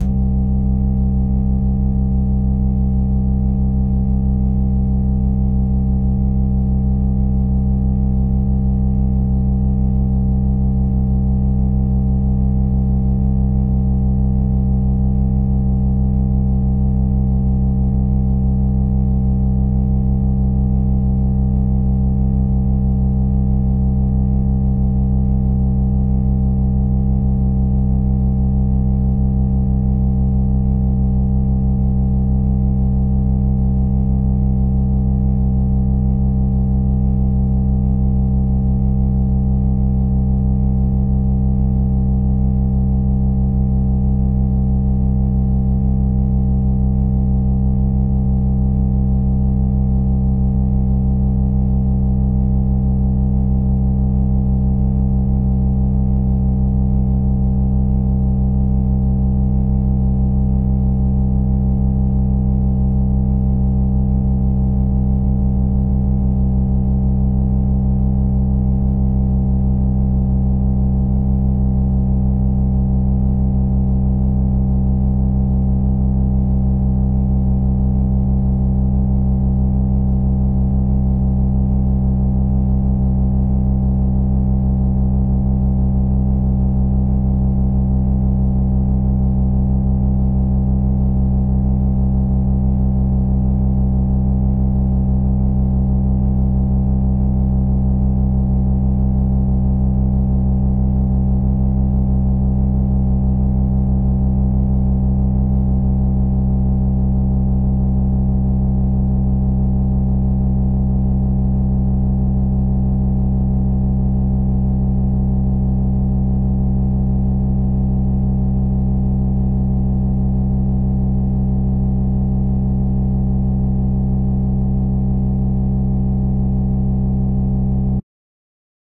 Drone made of mixed up synths created using Reaper DAW for an underscore in a theatrical sound design.
This one has been cut up and stretched out